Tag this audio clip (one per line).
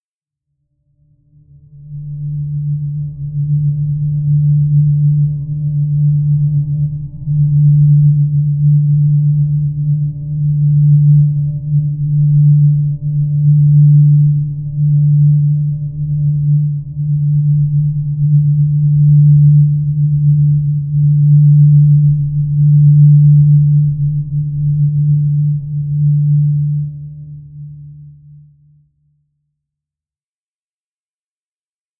multisample
ambient
drone
atmosphere